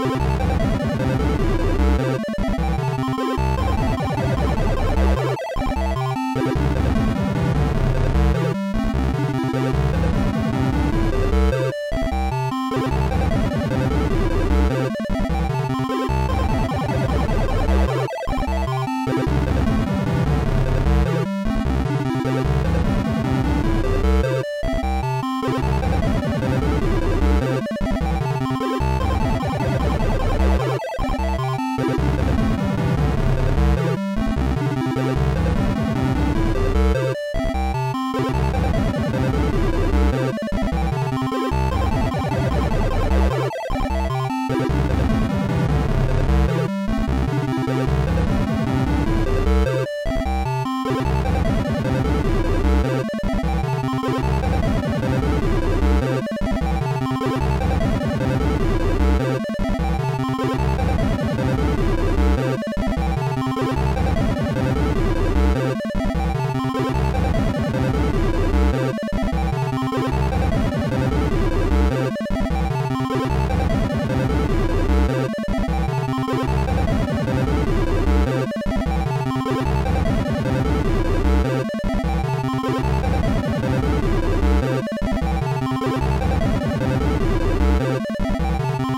My Arcade
chiptune melody done with Beepbox and Wavepad sound editor for Arcade type
games if desire.
Arcade, chiptune, computer, robot